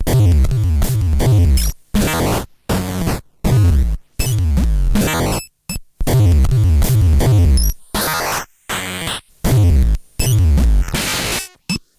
LSDJ drumloop slow game boy CPU
Akin to underclocking the game boy
drumloop, lsdj, nintendo, slow